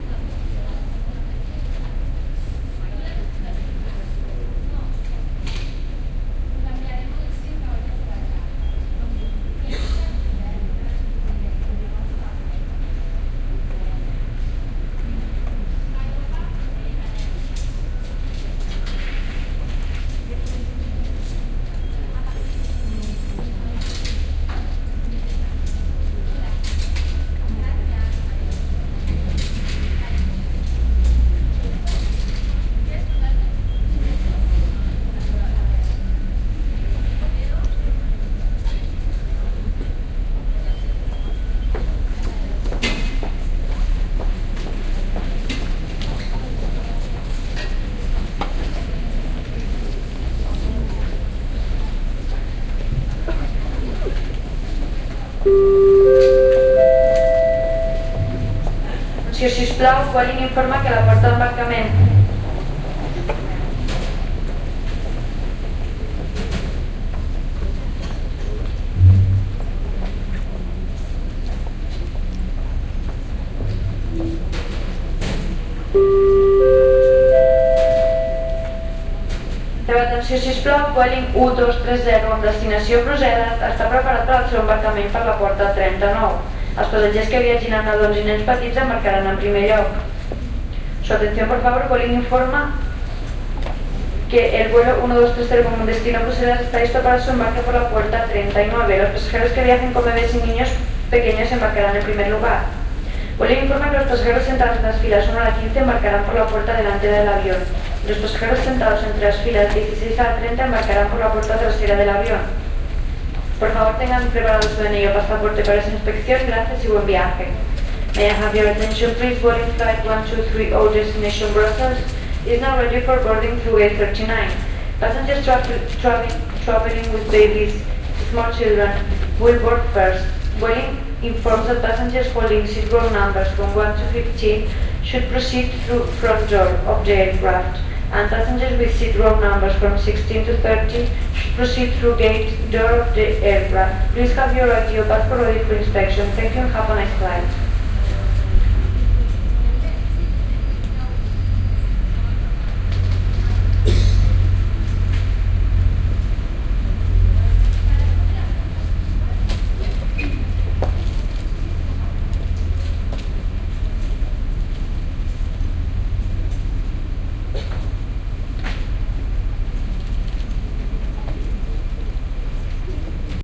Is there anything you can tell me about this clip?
01-barcelona-bcn-airport-gate39-announcement
Ambience recorded at gate 39 in the Barcelona airport Prat. At 55 seconds, a female flight attendant makes an announcement in 3 languages. Lot's of rustling and shuffling going on.
airport, announcement, barcelona, catalan, distorted, english, female, field-recording, gate-39, spanish, voice